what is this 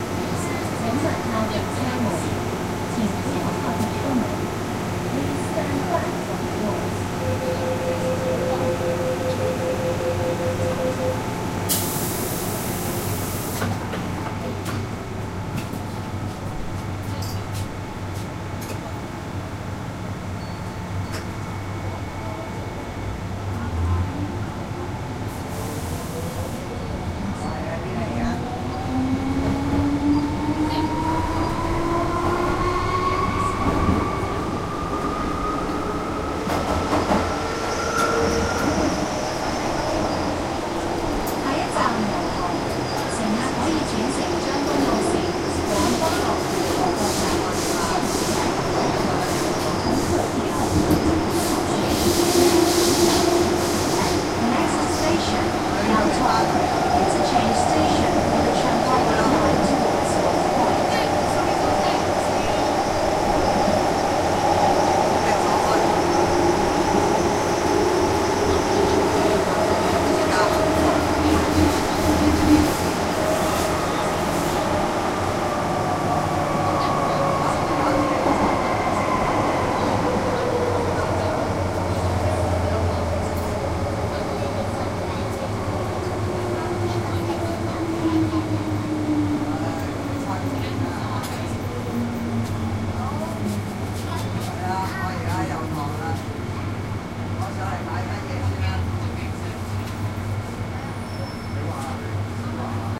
20110704-Axxx-LAT-YAT
A run sound of a forgotten consist number train which running through Kwun Tong Line. The interesting part is, this train has a very clear motor sound while running, rather than others.
Metro-Cammell, motor, motor-sound, MTR